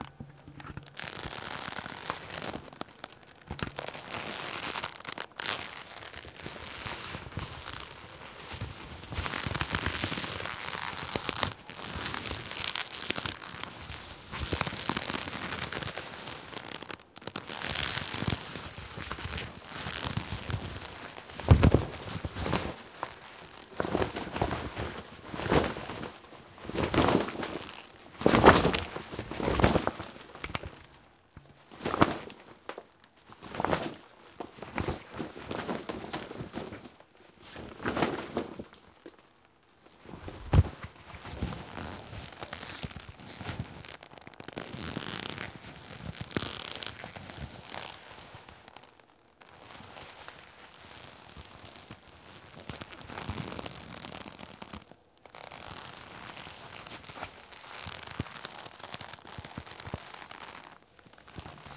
Scrunching the collar of my sweet leatha bomber jacket for some stretching/straining sounds. Also flapped it around a bit, apologies for the peaks in that part.
Recorded on Sony PCM-D50
clothes, scrunch, medieval, rustle, clothing, stretch, leather, squeak, jacket, flap